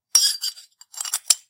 A quartz crystal rod scraped against a steel cup-like object to create the sound of a crystal being inserted into a socket.